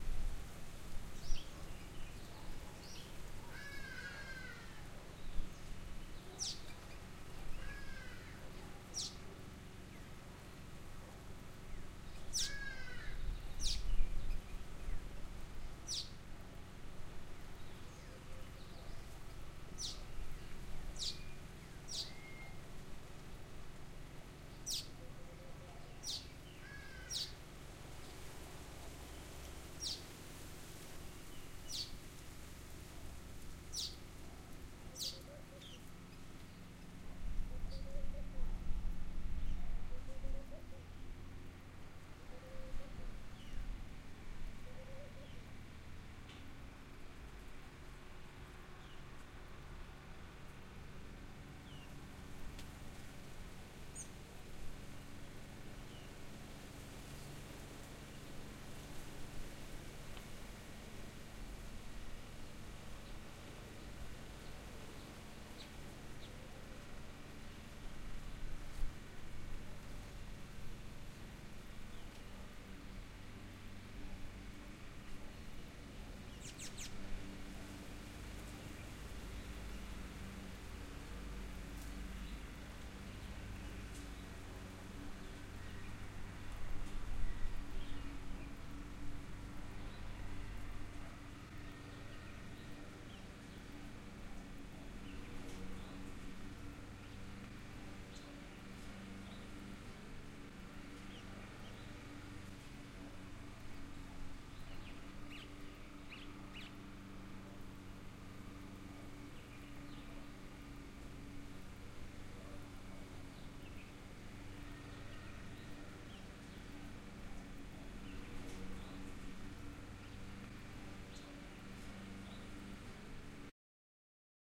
Birds Chirping and electrical motor running in the background
Many different birds chirping and a electrical motor running in the background you hear birds, owl and Adidas in the background.
OWI, Birds, electrical, motor